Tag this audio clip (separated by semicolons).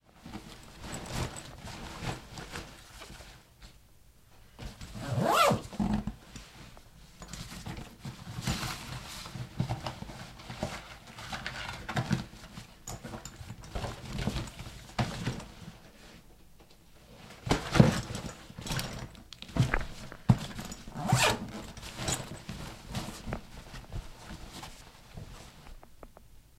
clothing; locker-room; gym-bag; zipper; bag